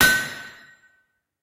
Large Anvil & Steel Hammer 4
A stereo recording of a single strike with a steel hammer on a piece of hot steel on a large anvil mounted on a block of wood. Rode NT4 > FEL battery pre amp > Zoom H2 line in.
metal, xy, anvil, hammer, steel-hammer, tapping, stereo